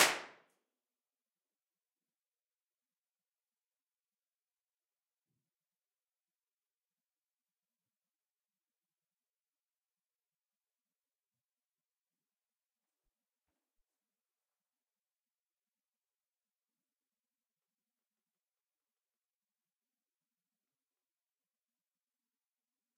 Conference room IR. Recorded with Neumann km84s.